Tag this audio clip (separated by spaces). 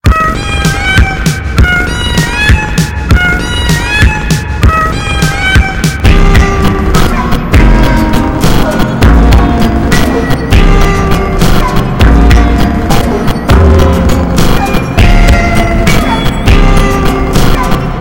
abstract audacity cat experimental from meow phonofiddle samples song sound